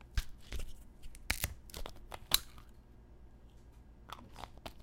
drink open
Plastic gatorade bottle open and close
bottle, close, drink, gatorade, lid, open, plastic